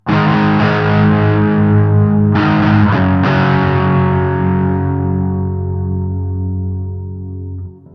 Guitar riff with medium overdrive.
Recorded with USB sound card - no noise.
Should be in Emaj scale?
This is one nice sound I found with several hours of work with my guitar equipment.